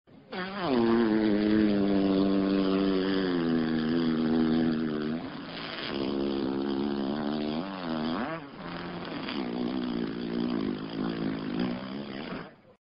Funny Farting
A surprise after dinner.
fart, flatulate, flatulation, gas, poot